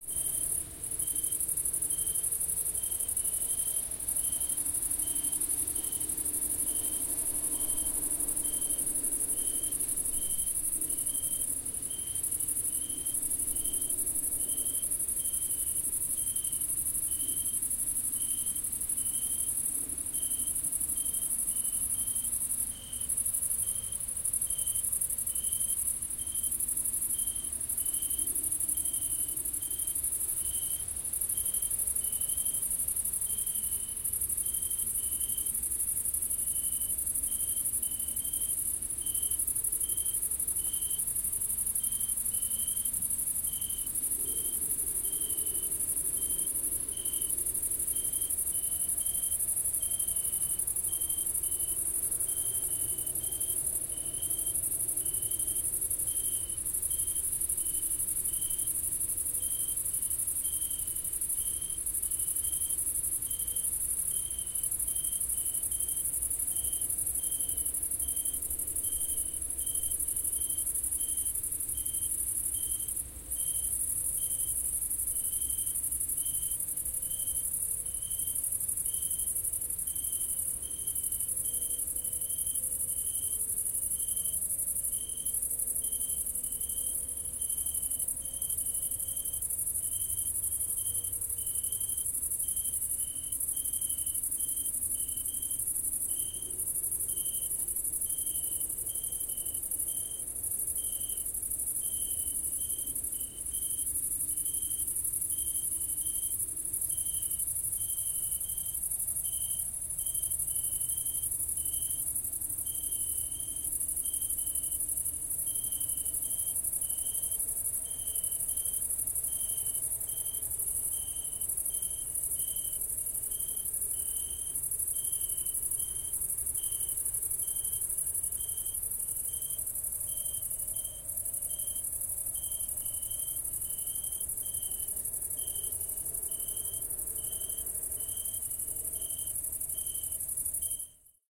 hereg hungary grain field 2 20080712
Summer night ambience, with combine harvesters and a motorcycle far away. Recorded at a grain field near the village Héreg using Rode NT4 -> custom-built Green preamp -> M-Audio MicroTrack. Unprocessed.
hungary, wind, combine, cicades, night, weed, summer, motorcycle, crickets